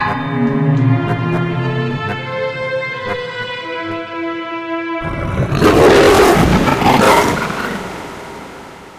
SemiQ intro 12
This sound is part of a mini pack sounds could be used for intros outros for you tube videos and other projects.
intros, delay, introduction, sound-design, soundscape, sound, sound-effect, pad, sci-fi, sfx, experimental, soundeffect, fx, effect